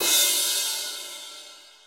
Gui DRUM SPLASH soft
guigui, drum, set, acoustic, mono